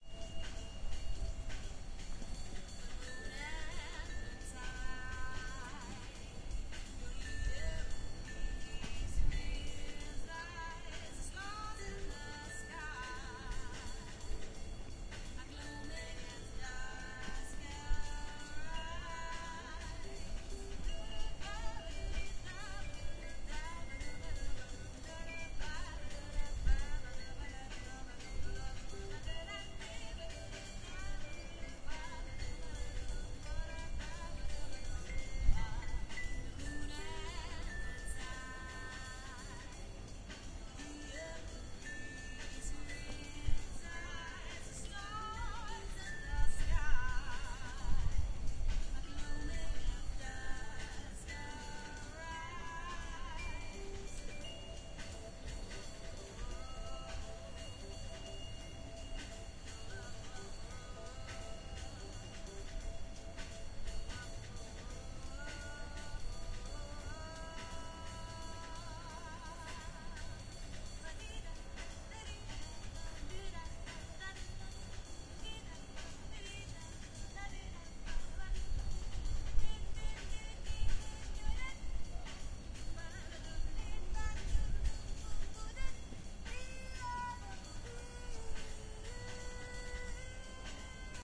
Some recordings of Menorca in vacations the last summer.
menorca, island, sea